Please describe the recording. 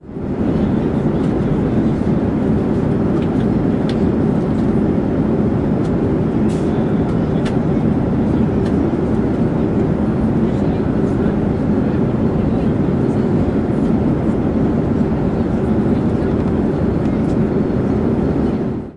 Flying High Up In The Sky, 05
Flying high up in the sky ! Flight attendant walks by with a carriage and serves.
This sound can for example be used in film scenes, games - you name it!
If you enjoyed the sound, please STAR, COMMENT, SPREAD THE WORD!🗣 It really helps!
journey, atmosphere, sky, In, ambient, airplane, up, flying, trip, travel, ambience, high, flight-attendant